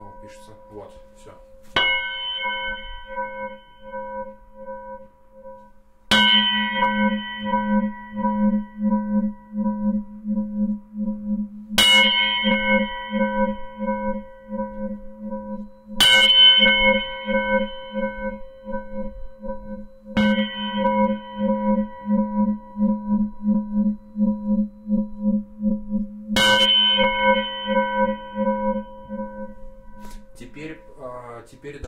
Hit metal pan cover bell vibration deep
Beating metal pan's cover with a wooden stick. Very similar with the bell sound. Long, bright and clear sound. Recorded on Zoom H6's shotgun mic.
bell, cover, deep, hit, metal, pan, stick, vibration, wooden